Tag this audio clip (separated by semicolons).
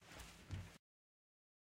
Body; floor; movement